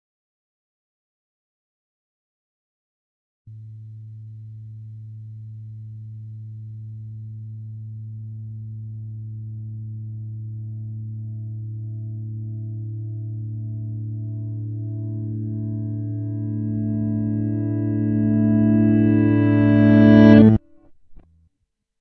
A sus 4 reverse
Reverse A sus 4 on a PRS guitar ending up with a sloppy strum. Zero fxs.
Asus4, Clean, Electric, guitar, PRS